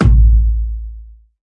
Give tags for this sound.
kick-drum
effected
bassdrum
designed
kick
bottle
oneshot
one-shot
processed
kickdrum

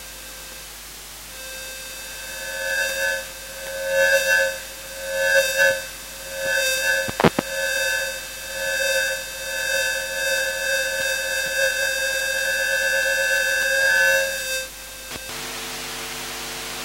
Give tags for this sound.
electromagnetic
pickup
laptop

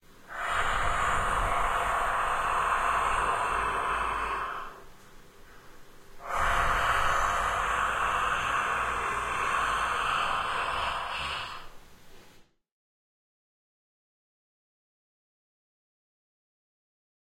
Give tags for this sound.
horror
ethereal
monster
evil
pocket
ghost
gasps
growl
bizarre

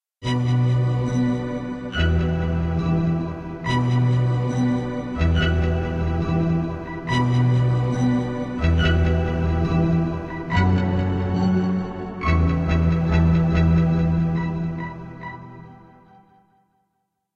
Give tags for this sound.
pad
background
hip
starship
spaceship
drone
ambient
futuristic
hop
future
noise
atmosphere

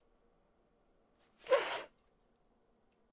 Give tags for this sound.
back
chair
scrape
stool

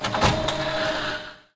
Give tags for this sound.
factory
mechanical
roll

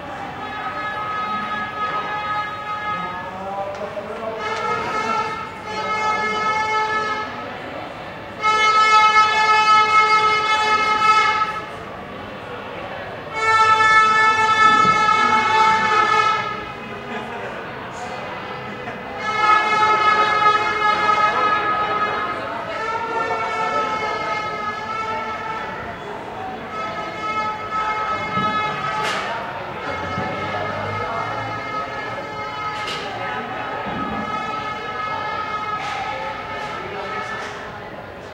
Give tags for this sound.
cheers
competition
fans
field-recording
football
game
goal
match
shouting
soccer
spanish
sport
voice
world-cup

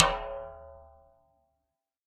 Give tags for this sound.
velocity
drum
multisample
tom
1-shot

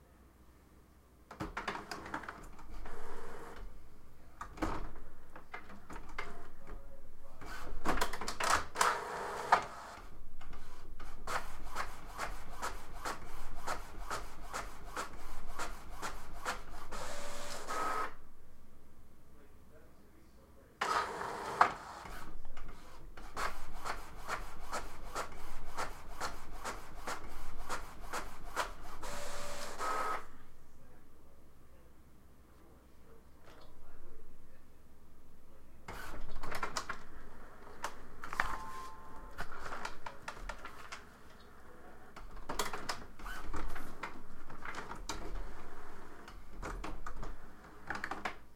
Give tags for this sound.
Printer
background
print
business
office

